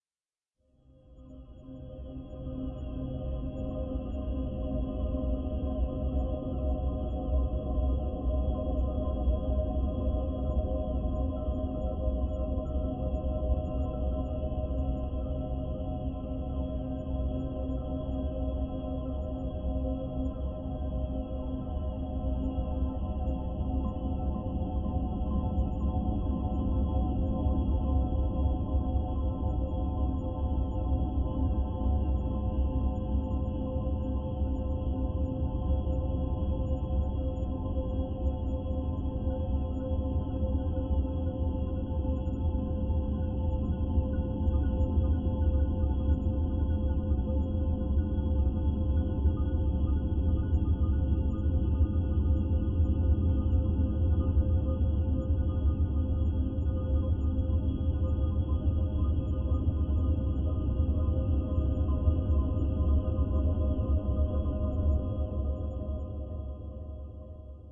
cine background9
made with vst instruments
ambience, ambient, atmosphere, background, background-sound, cinematic, dark, deep, drama, dramatic, drone, film, hollywood, horror, mood, movie, music, pad, scary, sci-fi, soundscape, space, spooky, suspense, thiller, thrill, trailer